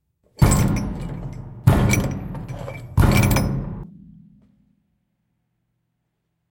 morley knocks echo
For the 2021 production of Christmas Carol I created an enhance version of Marley knocking. The knock is enhanced with a piano note and a chain. This version adds echo.